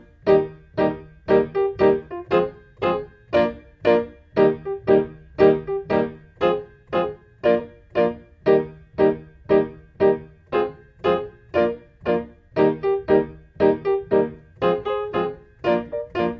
BC 117 Eb2 PIANO 1
DuB HiM Jungle onedrop rasta Rasta reggae Reggae roots Roots
onedrop, Jungle, roots, reggae, HiM, DuB, rasta